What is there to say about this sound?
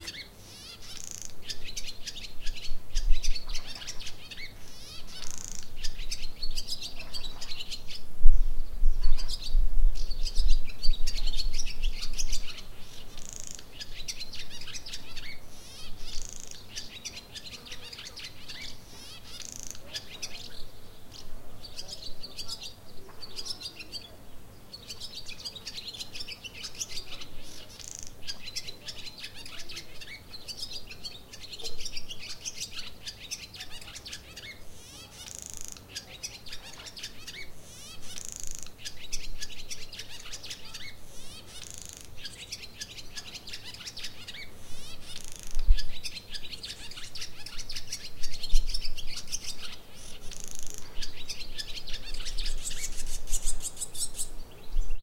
Could someone tell me what bird this is? I have absolutely no idea.